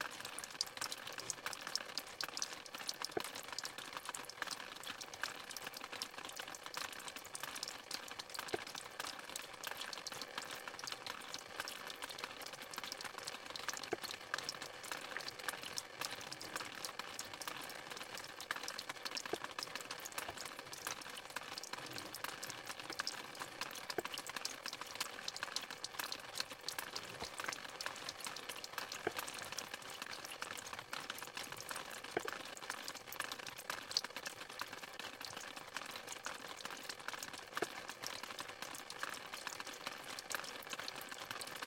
WaterTricklingOverRockLipNov1st2015
A beautiful little mini brook splashing over the lip of a limestone overhang located within a box canyon. The trickle of water falls a distance of about one foot onto several moss covered rocks.
This recording was made on Sunday November 1st, 2015 using the Marantz PMD 661 recorder and a single Sennheiser ME66 shotgun microphone.
Enjoy
creek, erosion, nature, stream, water, field-recording, splash, brook